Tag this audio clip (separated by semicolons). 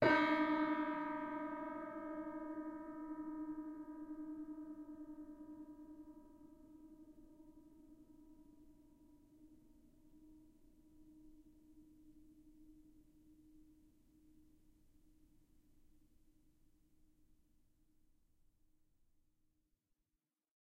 detuned; horror; old; pedal; piano; string; sustain